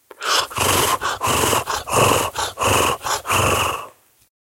Sound of the horse breathing.
breathing, horse, heavy, tired, gallop